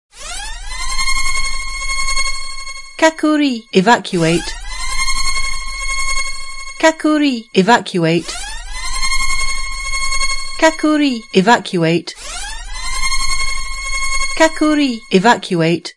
Air-raid klaxon with Japanese (Kakuri) announcement.
SofT Hear the Quality
air-raid, evacuate, klaxon, science, science-fiction, sci-fi, warning